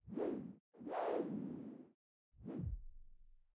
Whoosh Transitional Sound
Whoosh sounds made by filtering wind sounds. 3 versions available.
Transition, Wind, Noise, Whoosh, OWI